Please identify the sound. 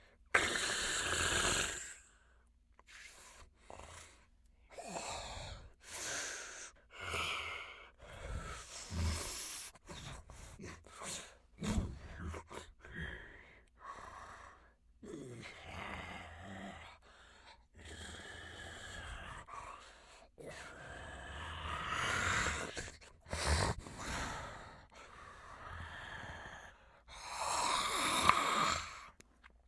Velociraptor Breathing
dinosaur, velociraptor, breathing